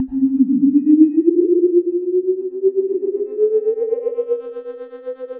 ONORO Julian 2014 2015 Flyingspaceship
Generate waveform sound
Effects:
Wahwah: Frequency LFO 0.2 Hz - Beginning phase 0 - Depth 38% Resonance 2,5 - Gap Frequency 30%
Generate whistle sound: sinosoïde Frequency at the beginning: 261Hz End 523,2 Hz. Amplitude (0 to 1) At the beginning: 0,8 End 0,1.
Effect Paulstretch
Stretch: 1 Resolution (seconds) 0,25 Echo: Delay time: 1second- Decay 0,5
Typologie: X+V
Morphologie:
Masse: son cannelé
Timbre harmonique: Ascendant - Brillant
Grain: lisse
Allure: Dynamique avec vibrato.
Dynamique: Attaque Ascendant
Profil mélodique: Variations serpentines ascendantes
Profil de masse: Calibre aigu